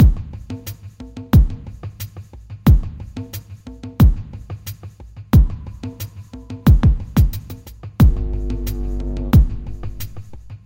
Ambient Groove 009
Produced for ambient music and world beats. Perfect for a foundation beat.
groove, drum, ambient, loops